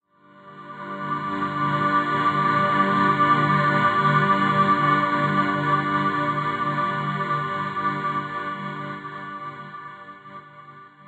A luscious pad/atmosphere perfect for use in soundtrack/scoring, chillwave, liquid funk, dnb, house/progressive, breakbeats, trance, rnb, indie, synthpop, electro, ambient, IDM, downtempo etc.
evolving, soundscape, house, 130-bpm, 130, wide, effects, dreamy, long, pad, progressive, ambience, melodic, expansive, reverb, morphing, luscious, liquid, atmosphere